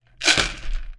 12-Comida perro 3-consolidated
Plastic, Food, Dog